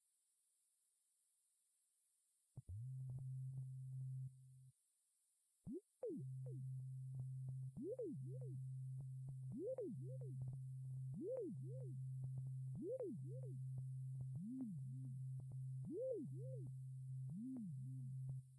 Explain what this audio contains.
Made in Ubuntu Linux with ZynAddsubfx software synthesizer, edited in audacity.